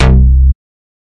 Analog Bass (Vel 12)
1, 4x4-Records, Analog, Bass, Beat, Closed, DRM, Drum, Drums, EDM, Electric-Dance-Music, Electronic, House, Kick, Loop, Off-Shot-Records, Open, Sample, Snare, Stab, Synth, Synthesizer, TR-606, Vermona